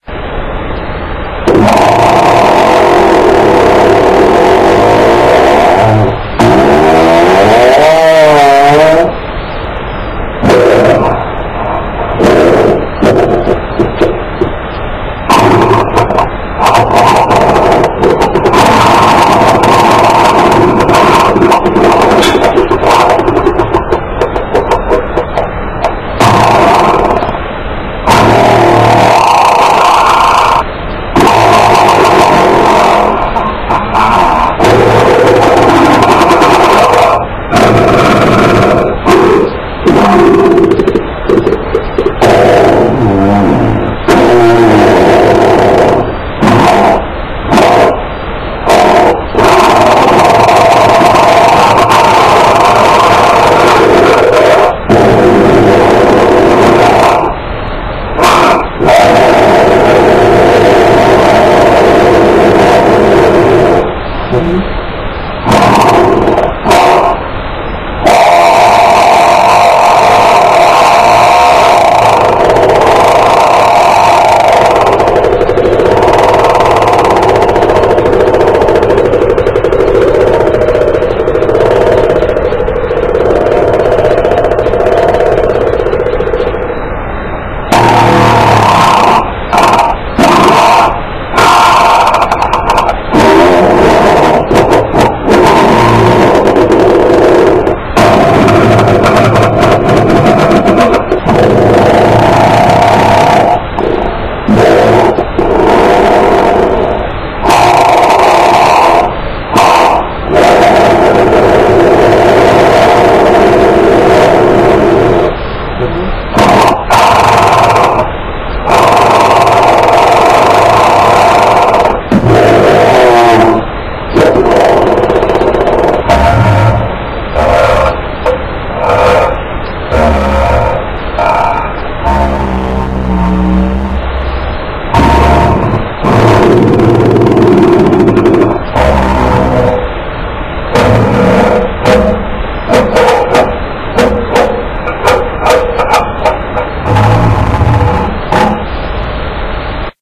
fart montage 3 resampled 2
beat, farting, flatulation, growl, laser, noise, poot, warcraft, world, wow